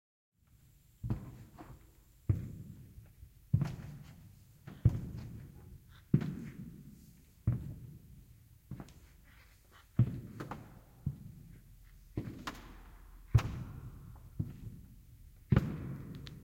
Footstep echoes in church
Echoing footsteps in church